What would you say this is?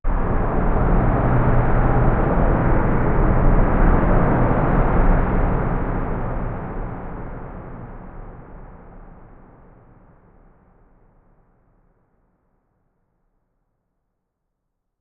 The sound of a cement mixer was recorded and processed (lowed pitch, some reverberation) to produce a noisy low pitch sound. Recorded with a Tascam DR 100 and processed with Audacity.